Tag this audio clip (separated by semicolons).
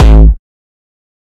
bass; beat; distorted; distortion; drum; drumloop; hard; hardcore; kick; kickdrum; melody; progression; synth; techno; trance